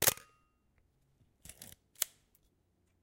camera shutter 01
The shutter of my old Pentax SP1000 camera, then winding the film, exposure set to 1/60 seconds. Recorded with the built-in mics on the Zoom H4.
shutter, photography, foley, camera, mechanical, pentax, click, small, film, photo, wind, slr